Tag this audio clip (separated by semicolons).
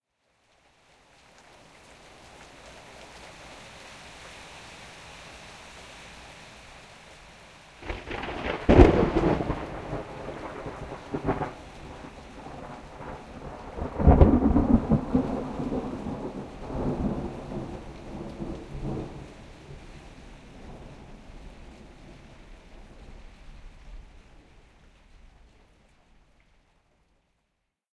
storm; crack; lightning; thunder